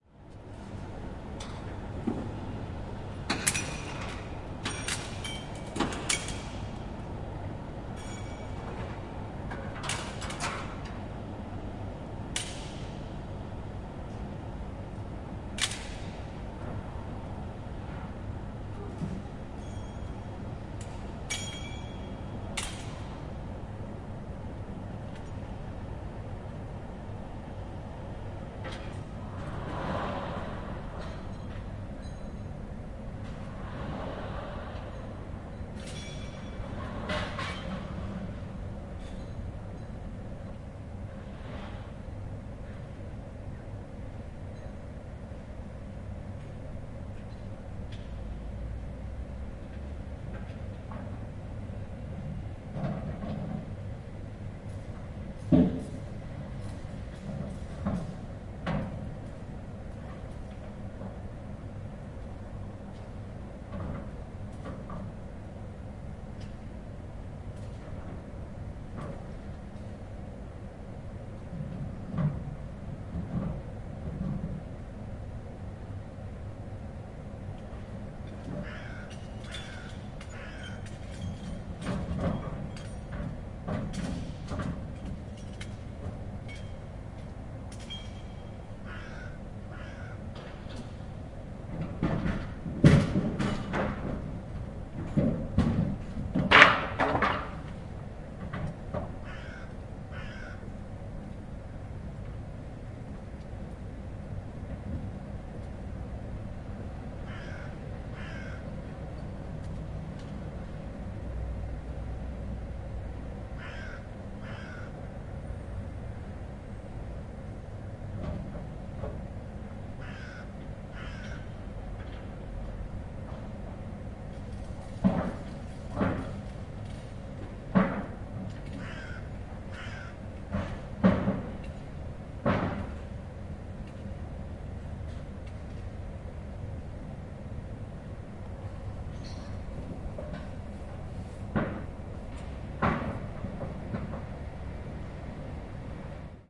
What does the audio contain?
110815- spedition company in hamburg
15.08.2011: sixteenth day of ethnographic research about truck drivers culture. Germany, Hamburg, transport company in the center. Sounds reaching from loading hall: banging, shuffling, rattling, clanking (sounds of securing load in the truck caravan).
bang, clank, field-recording, germany, hamburg, rattle, reverb, shuffle